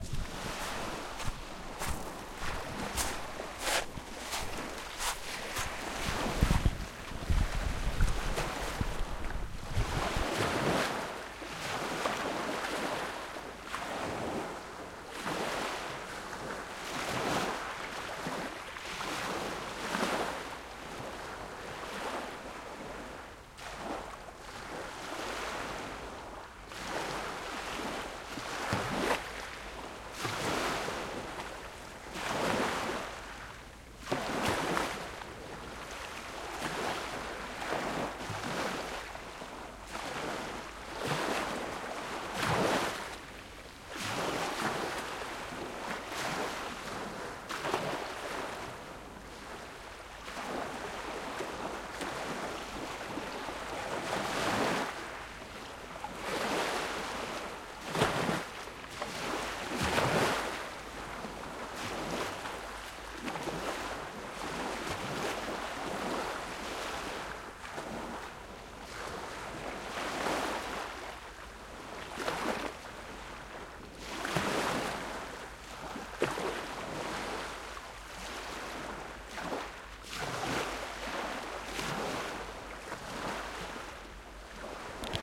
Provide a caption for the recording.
Ambient sounds of sea and people and amusements at Herne Bay, Kent, UK in the last week of July 2021. Things were probably a little quieter than usual because of coronavirus even if the official lockdown ended a week or so earlier.